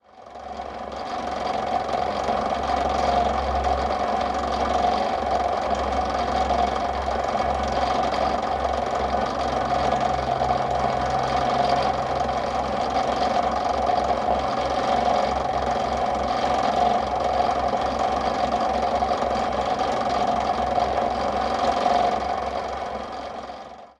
Disc Sander Hammond 9000
This is a Disc Sander in my Dad's woodwork shop. He made it himself so I am going to name it a Hammond 9000. Recorded on ipod touch 3g with blue mikey microphone and FiRe app.
It's just interesting to find out.
Thanks to My Dad, Bernard for operating his lather and enabling me to record his amazing machines!
tools, Hammond, power, woodworking, 9000, Sander, Disc, sound-museum, continuum-4, machines